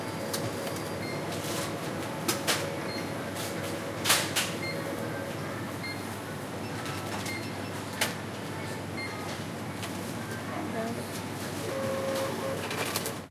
Cash register beeping, radio on the background, seller thanking.